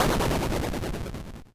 Simple retro video game sound effects created using the amazing, free ChipTone tool.
For this pack I selected the BOOM generator as a starting point.
I tried to stick to C as the root note. Well, maybe not so much in this one..
It's always nice to hear back from you.
What projects did you use these sounds for?